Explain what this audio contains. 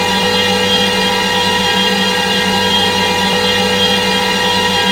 Created using spectral freezing max patch. Some may have pops and clicks or audible looping but shouldn't be hard to fix.
Sound-Effect, Still, Atmospheric, Background, Freeze, Perpetual, Everlasting, Soundscape